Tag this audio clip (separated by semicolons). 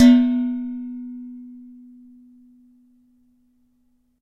bang; hit; kitchen; lid; metal; pot